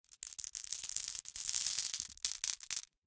Glass marbles being poured back and forth from one hand to another. Grainy, glassy sound. Close miked with Rode NT-5s in X-Y configuration. Trimmed, DC removed, and normalized to -6 dB.
glass, hand, marble, pour, shuffle